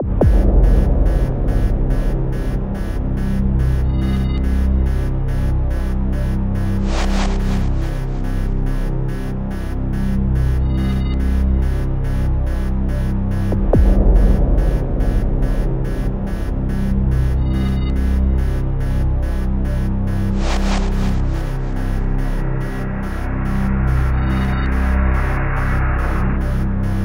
A very dark and intense loop with digital sounding beep. Kinda like your in the hospital awaiting the worst to happen.